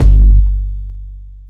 mixed basses together